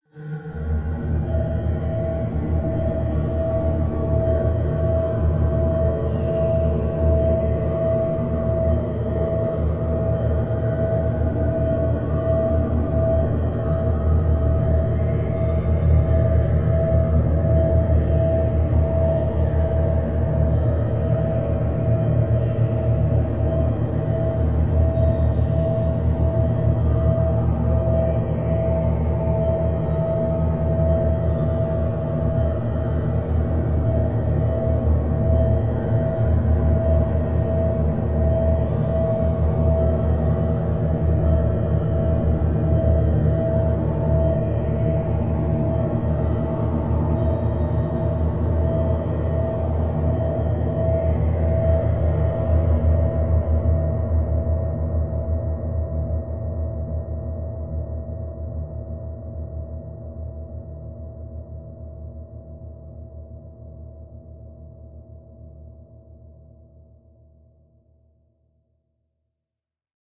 Black Asylum
Mild percussive distant disturbances in a feedback-like hum.
Horror, Drone, Dark, Atmosphere